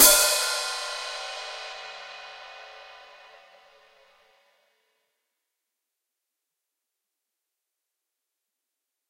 SkibaCustomHiHats1145Top1215BottomFootSplash
Sampled from custom-made 13.5 inch HiHat cymbals created by master cymbal smith Mike Skiba. The top cymbal weighs in at 1145 grams with the bottom weighing 1215 grams.This is a "foot splash" sound created by briefly closing and clashing the cymbals with the foot pedal, then allowing them to spring apart.
cymbal, skiba